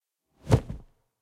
VS Short Whoosh 4
Short Transition Whoosh. Made in Ableton Live 10, sampler with doppler effect.
fast, video, swish, transition, swoosh, fx, sfx, whoosh, foley, short, effect, game, sound, woosh